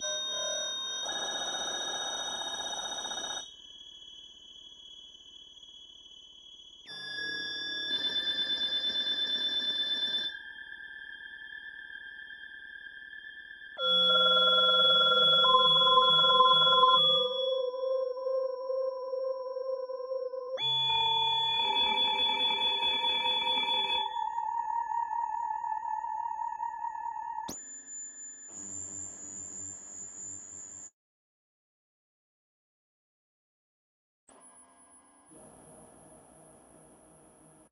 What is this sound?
op-9 strange phonecall
Some random FM effects with sinusoidal sounds.